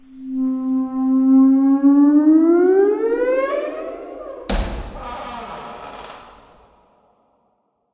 Door creaking 04 3 with Reverb
hinge, squeaking